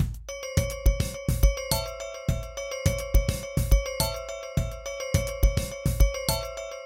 Mix-loop-1-Tanya v
drum, music-box